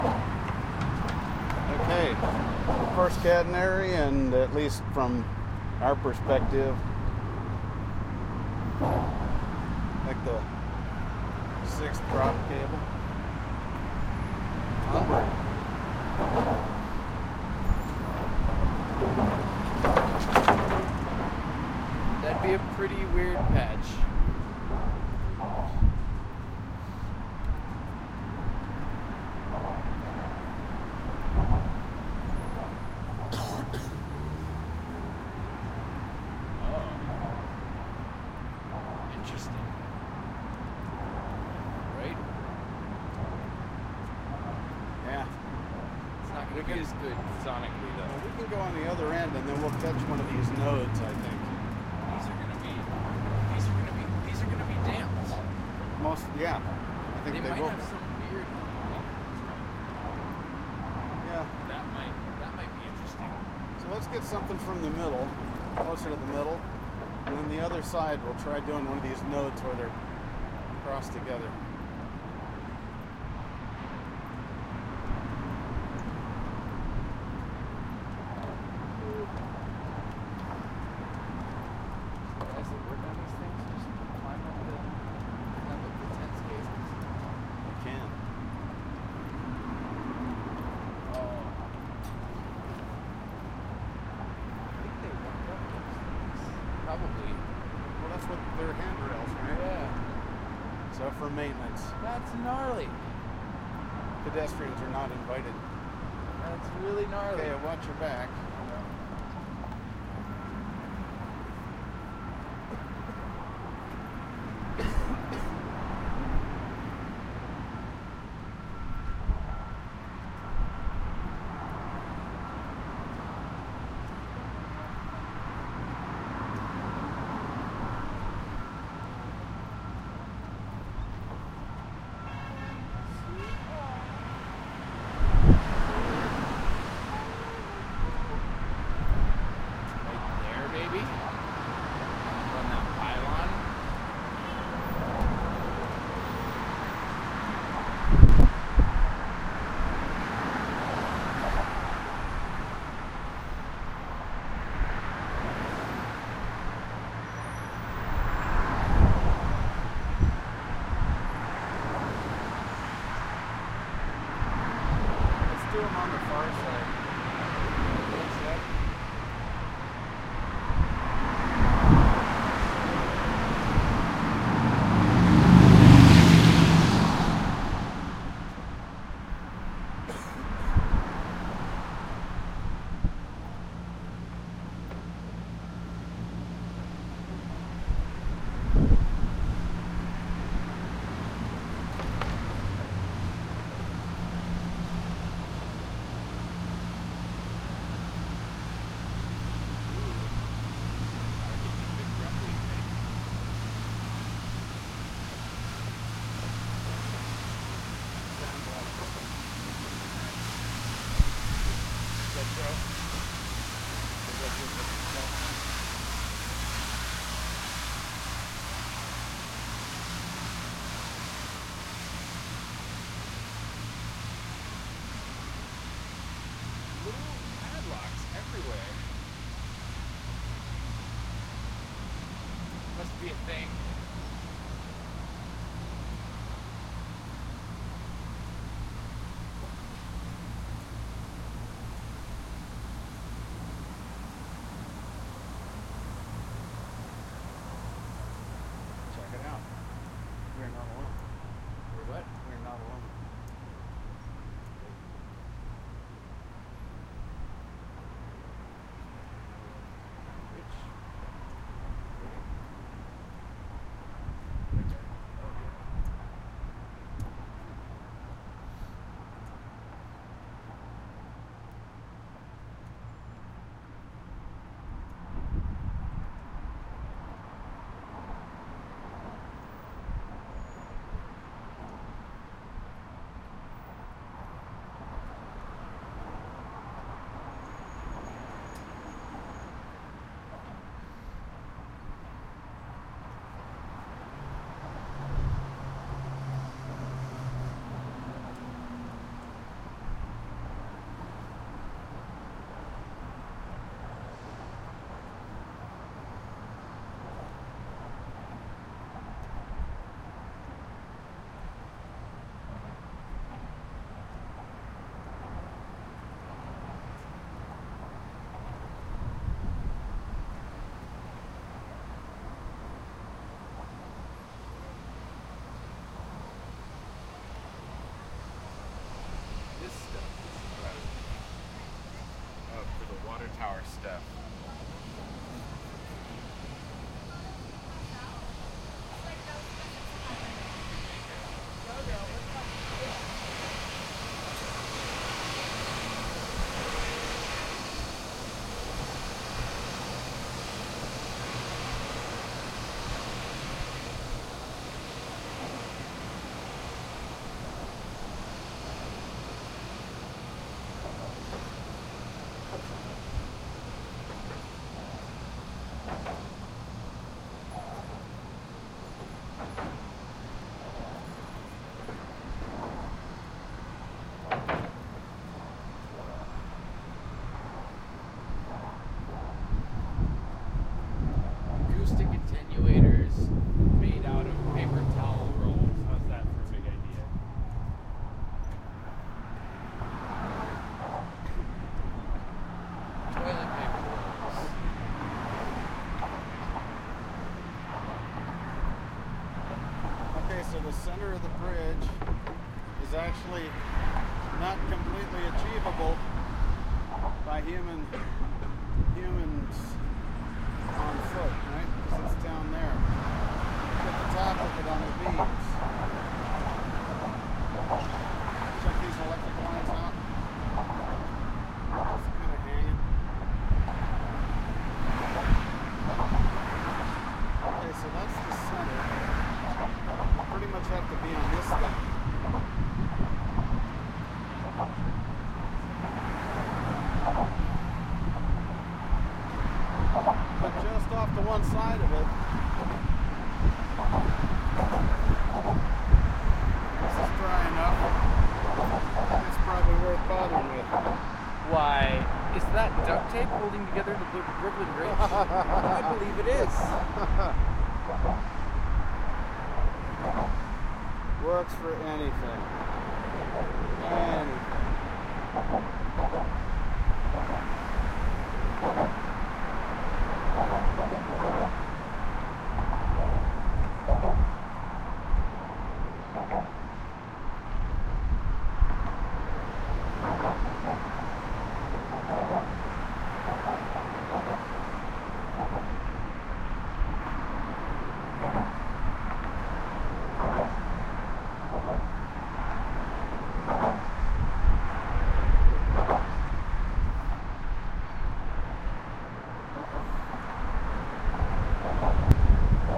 BB 0104 commentary
Ambient stereo recording taken on the pedestrian walkway of the Brooklyn Bridge on the Brooklyn side. Recorded April 11, 2011 using a Sony PCM-D50 recorder with built-in microphones. Conversation, bicycles, traffic and wind noise.
bicycles, Brooklyn-side, built-in-mic, people, Sony, traffic, traffic-noise, wikiGong